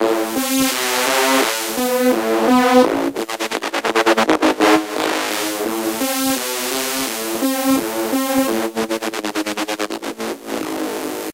Soft distortion reese. Notch filter and a fast LFO.